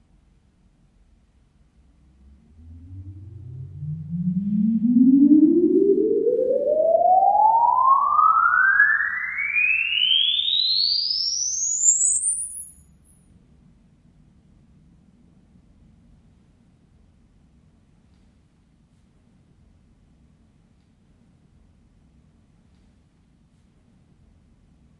A second Impulse Response of a large concrete stairwell in an industrial shopping center.
You can deconvolve all these recordings with the original sweep file in this pack.
Happy Deconvolving!